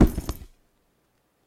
0014 Bag Punch
Recordings of the Alexander Wang luxury handbag called the Rocco. Bag punch
Alexander-Wang, Handbag, Hardware, Leather